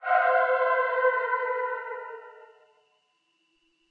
32930 Seagul 01 stretch 03
This is a stretched seagul cry that we have used in our collab piece.
It sounds like a pad with haunting vocal characteristics.
Stretched with Paulstretch. I seem to recall that the stretch parameter was something like 50-100 times.
Seagul sound came from here: